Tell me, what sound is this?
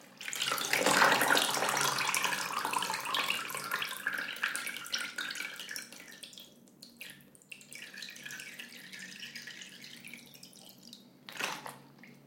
20080103.wring.out
a soaked piece of clothing is squeezed